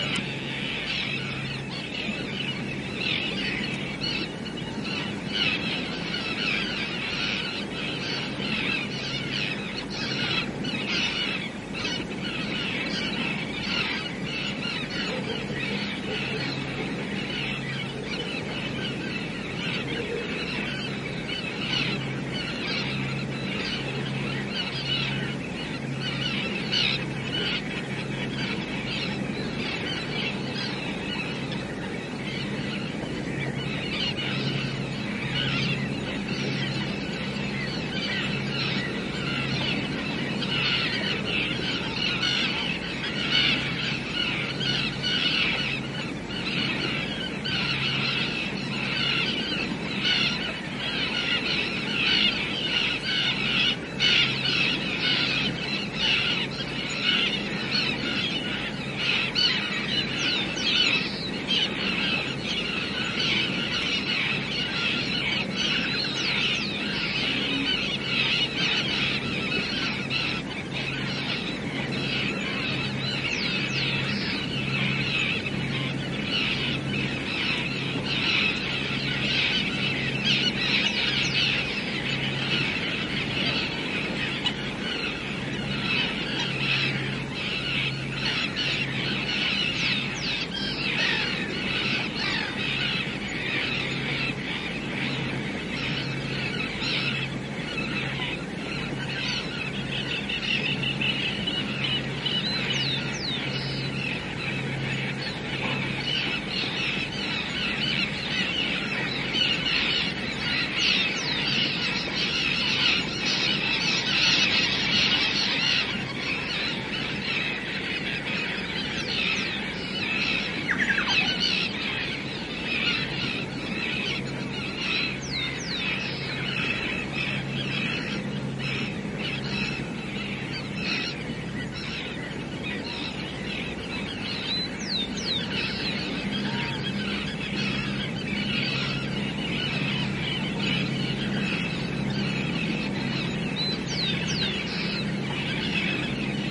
Seagull and engine activity
A recording of a big flock of screeching seagulls from a height. You can also hear the constant hum of engines of industrial mussel farming in Chiloé.
Rec'd on a MixPre6 with LOM Usi Pro microphones.
beach, coastline, engine, field-recording, seagull, usi-pro